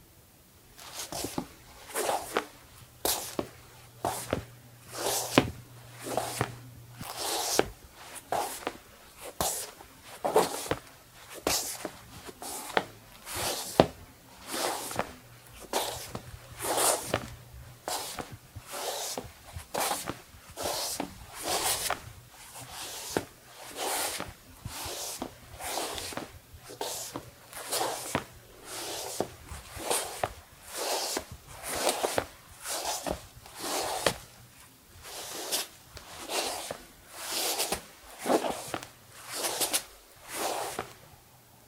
Footsteps, slippers dragging on tile
Slippers dragging on bathroom tile
bathroom, drag, slippers